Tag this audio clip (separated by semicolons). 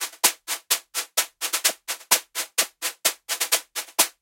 beat; drum; drum-loop; groovy; hit; improvised; loop; one; percs; percussion; shot; techno